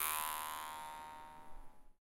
jewharp recorded using MC-907 microphone
oneshot, jewharp, ethnic